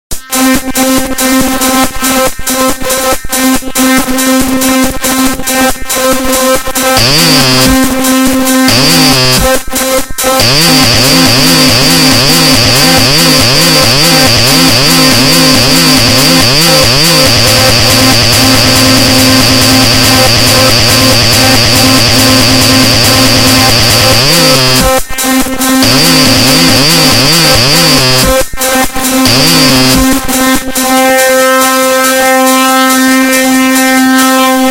this melodie - loop is hard and distorted , u can use this one in gabber music or hardtechno tracks !!
crazy, distorted, gabber, hard, hardcore, loops, melodies, sounds, synths